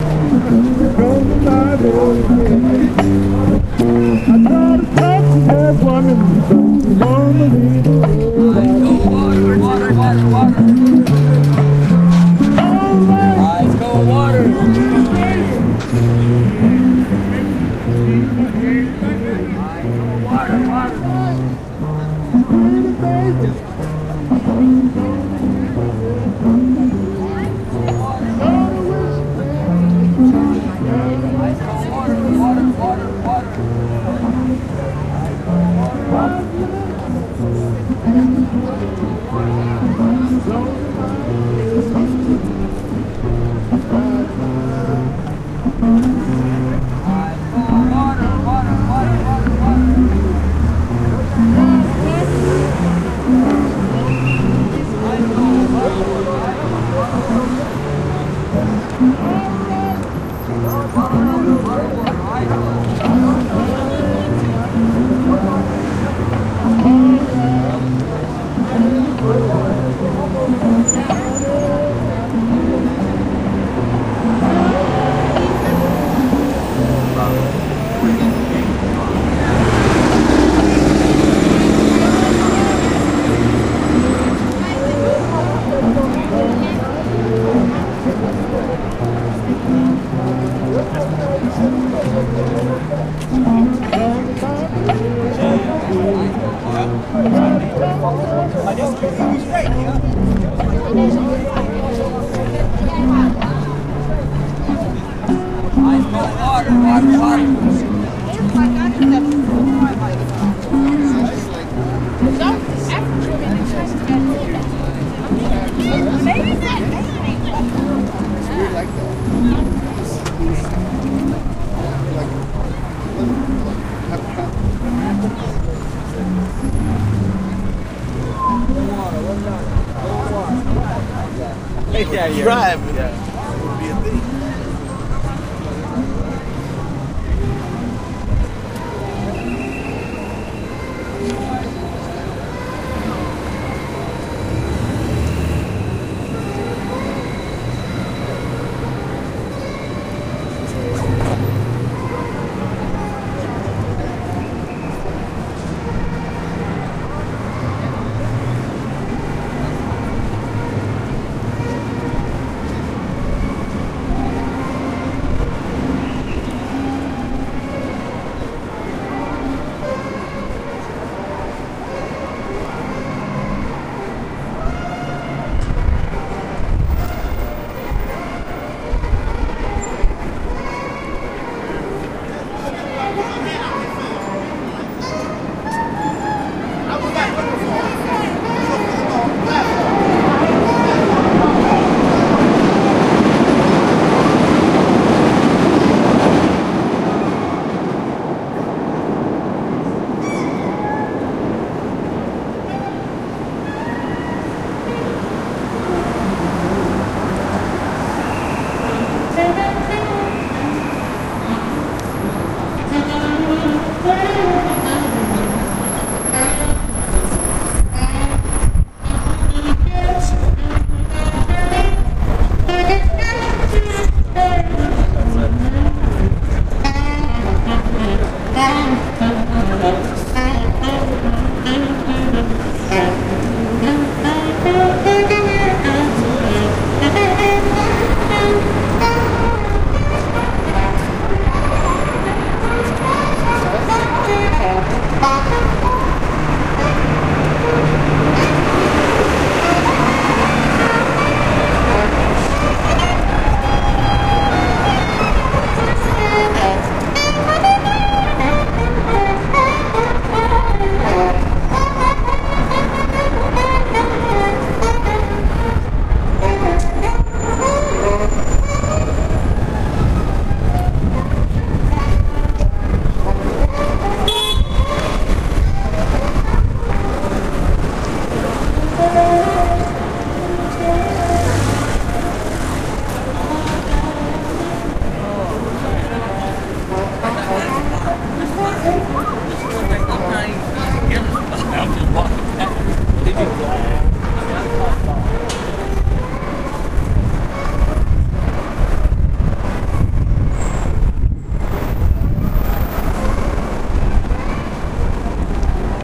Downtown walk during bluesfest
There is a street musician downtown in the Chicago area during blues fest. You can hear the background of automobiles in the background. Also, there is a guy selling 'ice cold water'. Some wind is heard since I was walking around.